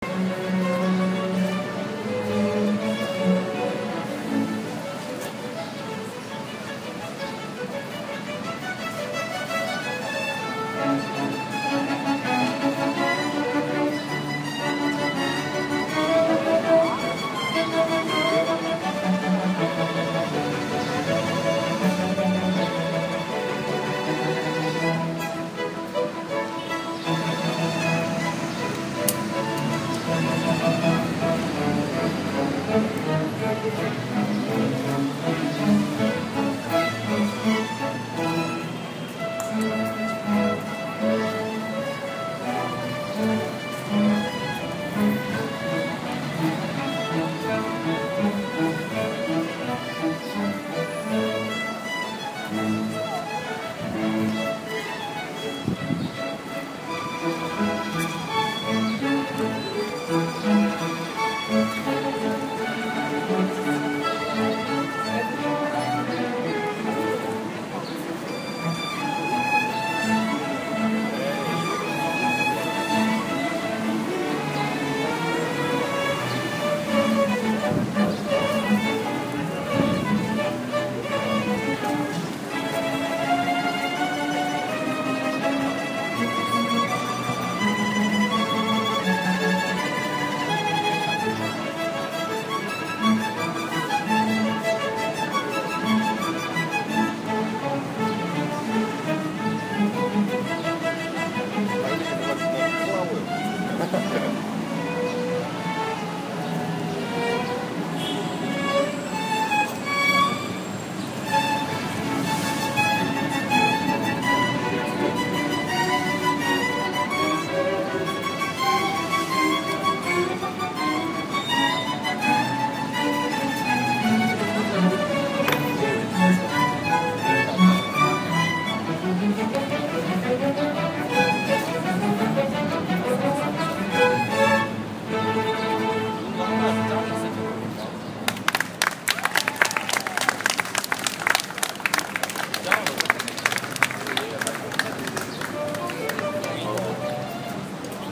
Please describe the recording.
string quartet playing in the streets of Paris